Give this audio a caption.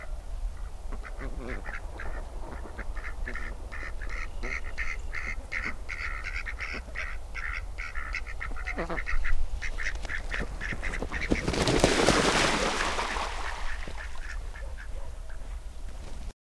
Ducks; quacking; near; jumping into water